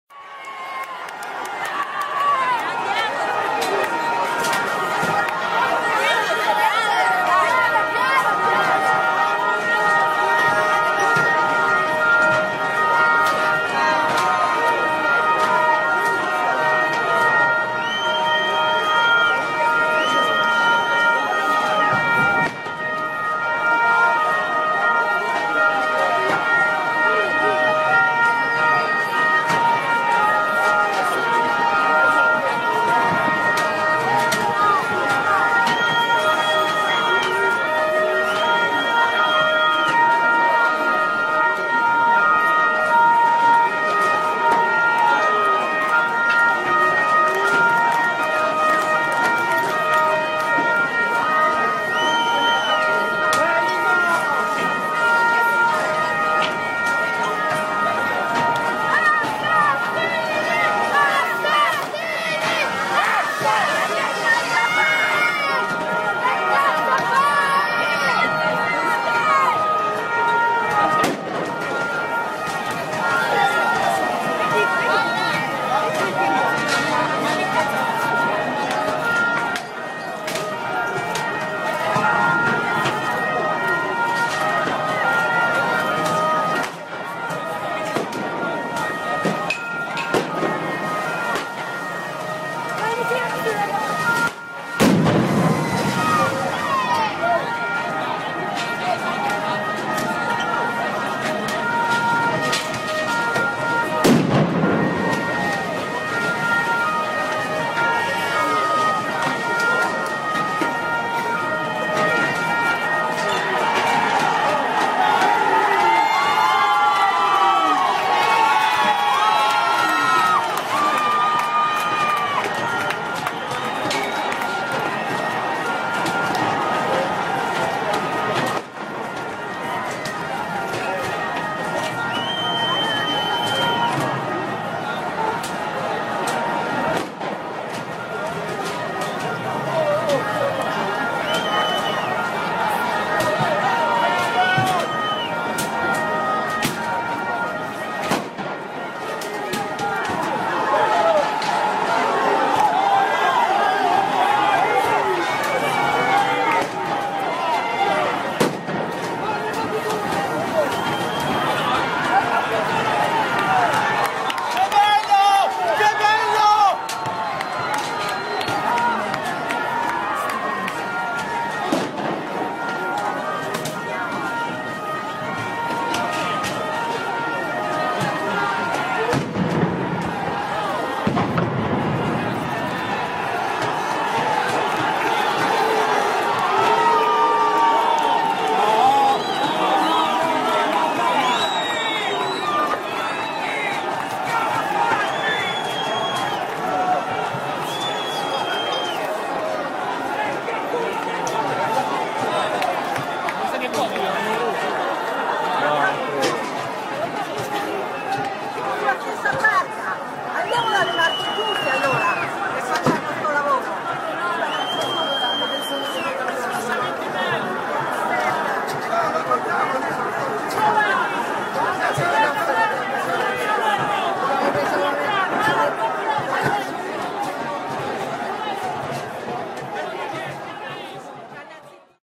Rome San Giovanni 15ott2011 Riots
People during the riots in Piazza San Giovanni (Italy) on October 15th 2011. At the end a police truck was blocked by the crowd who rejoy and scream.
crowd, crowd-scream, field-recording, live-recording, people, riots, square-sound